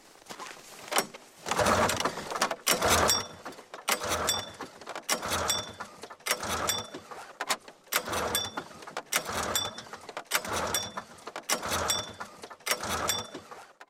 snowmobile false starts pulling cord1 nothing
snowmobile false starts pulling cord nothing
cord, false, pull, snowmobile, starts